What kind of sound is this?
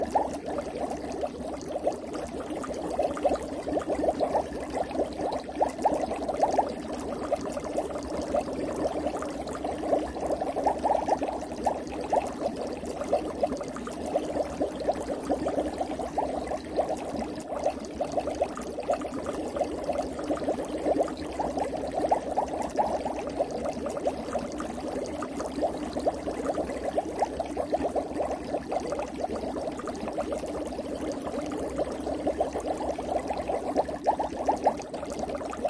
A sound of boiling water with a lot of bubbles in it. It all comes from one bubbling sound, and it is properly processed by Atomic Cloud, a granular synthesis program that played the sound with quite long grains and not so fast attack on 'em to make the bubbles melt together in a nice fine texture.